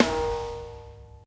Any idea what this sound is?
Snare sample - 2015 - 4 Punch of Evgeniy
Bosphorus, bronze, bubinga, click, Cooper, crash, custom, cymbal, cymbals, drum, drumset, hi-hat, hit, Istambul, metronome, one, one-shot, ride, shot, snare, TRX, turks, wenge, wood, Young